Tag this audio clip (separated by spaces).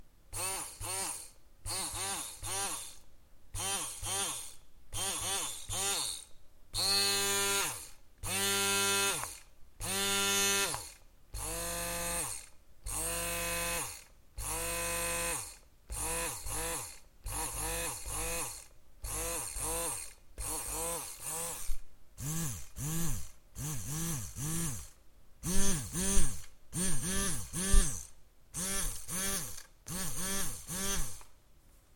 Percussion Cooking Kitchen Home Indoors Foley Household House